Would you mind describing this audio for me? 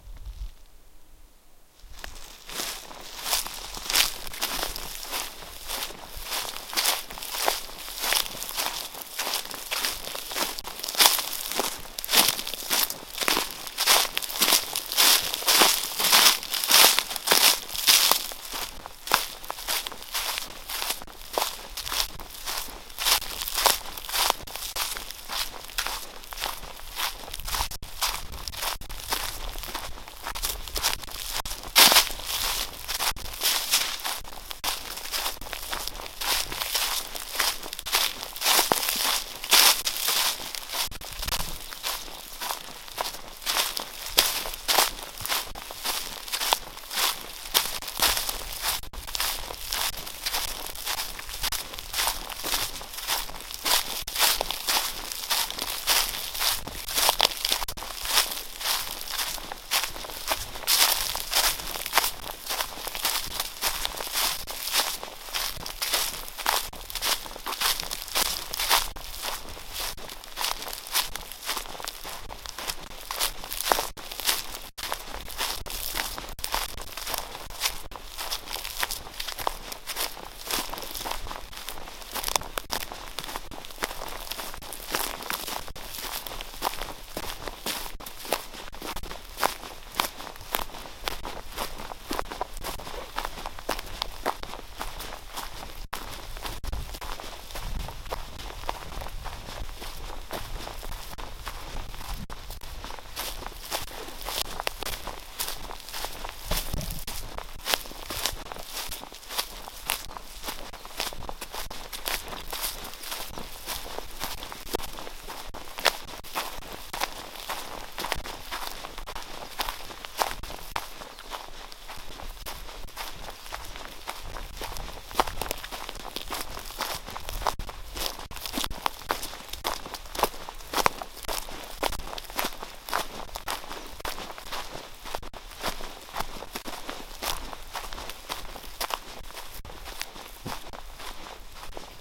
footsteps in forest
Footsteps in the forest
feet, foot, footstep, footsteps, forest, in-the-forest, leaves, litter, step, steps, Step-walking, tree, walk